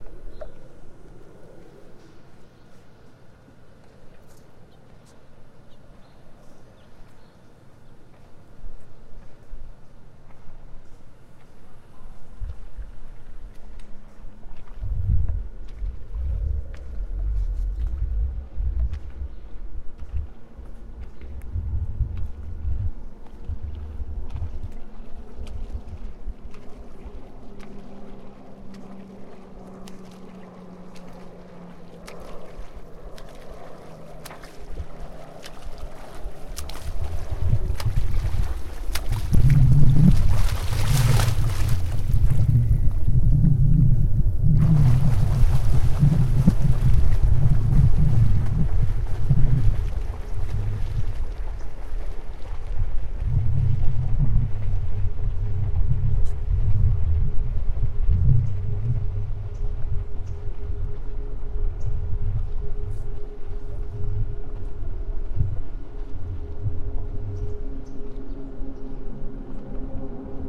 Walking Water
footstep, puddle, walk, water